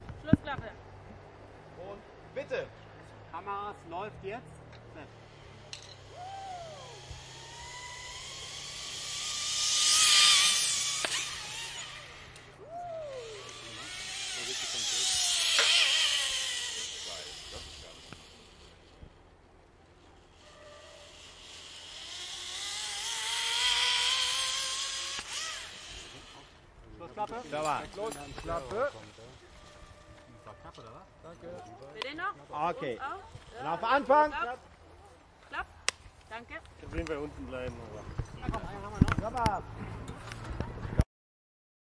Ropeway fun
In a fun park